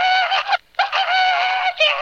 Flowers Like to Scream 09
not-art, stupid, very-embarrassing-recordings, vocal, yelling